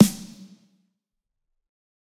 Snare Of God Wet 009
drum, drumset, pack, realistic, set